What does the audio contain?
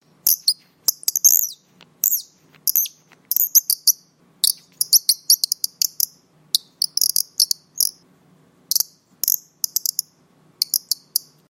Bird Chirps
Many types of bird chirping, created by a wooden toy bird call recorded in studio.